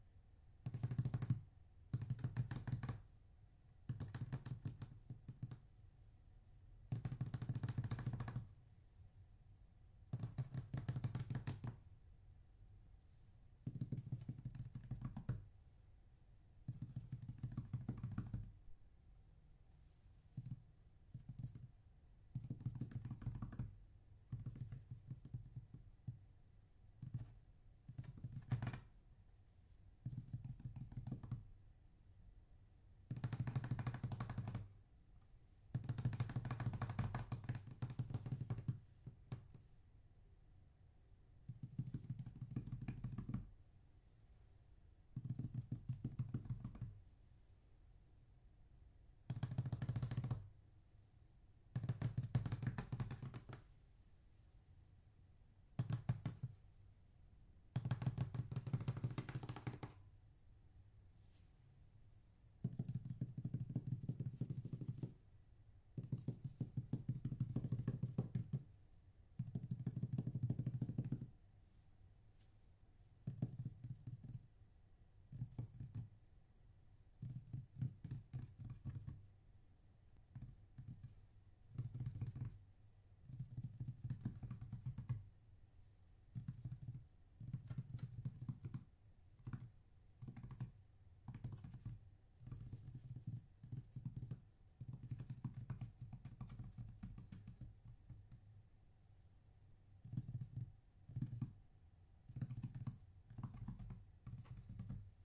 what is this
Small Creature Scamper on Tile
Small creature scamper/skitter sounds. Performed using my fingertips on a tile bathroom floor.